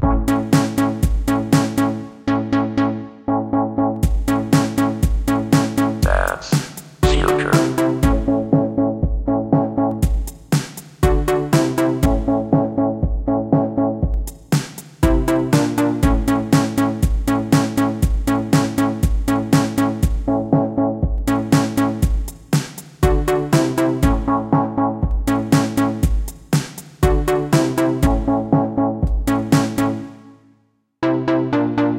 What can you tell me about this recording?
synth loop
Another loop! :D have fun (created with Fl studio mobile)
drums, dubstep, happy, loop, synth, synthesizer